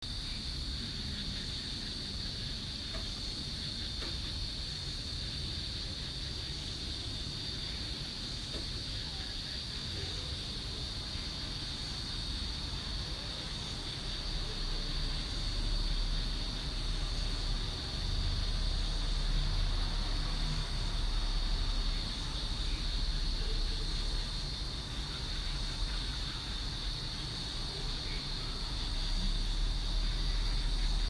Dusk with crickets
Recorded from my balcony at dusk. I live in front of a forest
insects, field-recording